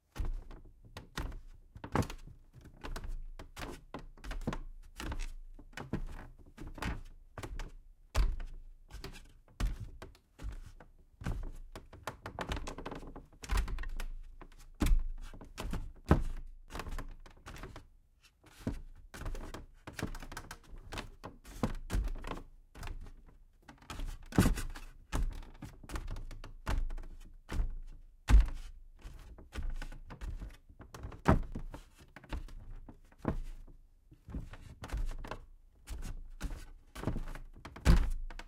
Footsteps on wooden floor XYH6
Creek, Floor, Footstep, Ground, Step, Walk, Wood, Wooden